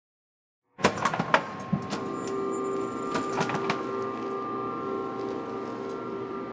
MITSUBISHI IMIEV electric car WHEEL mechanism ext
electric car WHEEL mechanism ext
car, electric, mechanism, WHEEL, ext